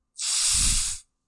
Gas Release
release, gases, gas